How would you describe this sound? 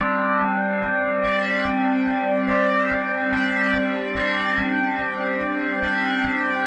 Actually, it's a little bit of a sound package. But sharing is always good. My drum bass sounds can be used in house, nu-disco and dance pop projects. Obviously when I was listening, I felt that these sound samples were a bit nostalgic. Especially like the audio samples from the bottom of pop music early in the 2000s. There are only drum bass sound samples. There are also pad and synth sound samples prepared with special electronic instruments. I started to load immediately because I was a hasty person. The audio samples are quite lacking right now. There are not many chord types. I will send an update to this sound package as soon as I can. Have fun beloved musicians :)